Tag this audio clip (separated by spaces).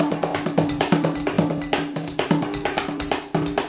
130-bpm acoustic ambient beat beats bottle break breakbeat cleaner container dance drum drum-loop drums fast food funky garbage groovy hard hoover improvised industrial loop loops metal music perc percs percussion